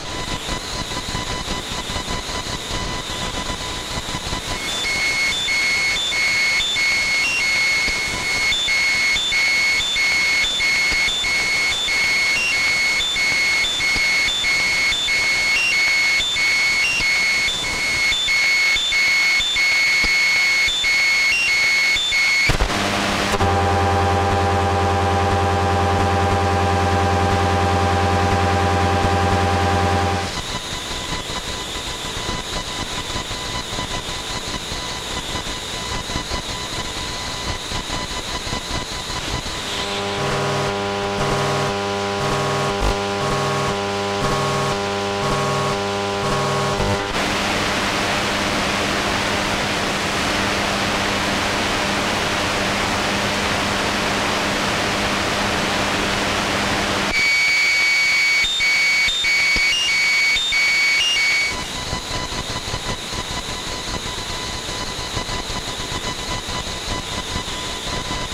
AT&T Cordless Phone with computer noise AM Radio
Electro-magnetic interference from a desktop computer, and an AT&T; cordless phone handset CL82301 when held near the internal Ferrite antenna on the back right of a 13-year-old boombox listening to the AM broadcast band. Recorded 2 years ago so I forget where I was listening. Recorded with Goldwave from line-in. You hear EMI from the computer at first, then I bring the phone on standby near the radio and you hear a series of nearly pure tones. The phone comes on and you hear a distorted dial tone. I move the phone away from the radio for a few seconds and you hear the computer again, then I bring the phone near and you hear a distorted busy signal. I disconnect and the phone continues sending to the base for a few seconds so you just hear a hum, then the idle tones are heard, then the computer noise as I remove the phone.
distorted, busy-signal, EMF, dial-tone, radio-interference, EMI, sequence-of-tones, interference, hum, noise, beep, pulse, desktop, tones, computer, glitch, cordless-phone, digital, am-radio, electro-magnetic, buzz, electronic